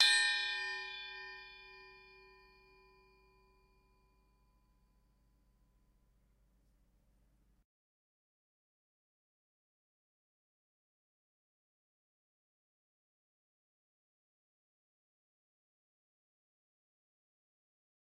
Heatsink Large - 13 - Audio - Audio 13
Various samples of a large and small heatsink being hit. Some computer noise and appended silences (due to a batch export).
bell, heatsink, hit, ring